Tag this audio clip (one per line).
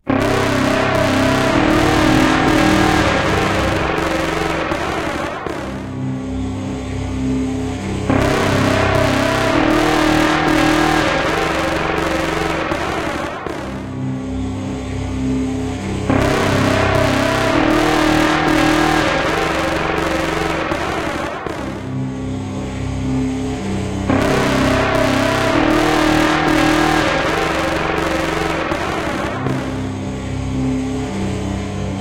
Noise
Bass
Music
Electronic